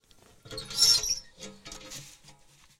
an old mailbox being opened. recorded with a sennheiser mkh 8060 on a Zoom F8